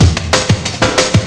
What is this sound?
Experimenting with beats in analog x's scratch instead of vocal and instrument samples this time. A tribute to spasmodic seizure indusing music everywhere. I was messing with turntable speed in scratch application.